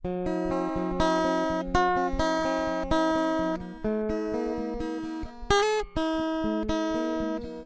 Samples of a (de)tuned guitar project.
guitar, chords